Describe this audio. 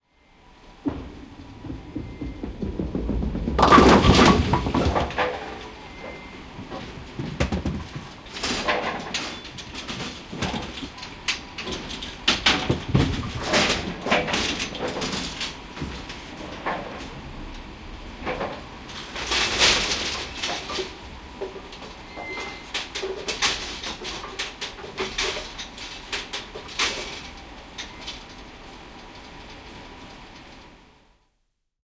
Keilailu, osuma, keilakoneisto / Bowling, strike, hit, bowling machine lifting bowling pins, a close sound
Keilapallo osuu keilapatteriin, keilakone nostaa keilat. Lähiääni.
Paikka/Place: Suomi / Finland / Helsinki
Aika/Date: 20.07.1971
Bowl, Bowling, Bowling-alley, Bowling-machine, Bowling-pins, Field-Recording, Finland, Finnish-Broadcasting-Company, Hit, Keilahalli, Keilailu, Keilakone, Keilapallo, Keilat, Osuma, Soundfx, Sport, Sports, Strike, Suomi, Tehosteet, Urheilu, Yle, Yleisradio